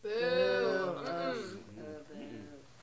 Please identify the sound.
Boo 5 only a couple people
Just a few people booing.
theatre, studio, theater, crowd, group, boo, booing, audience